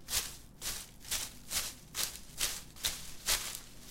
Steps on grass.
foley, grass, ground, steps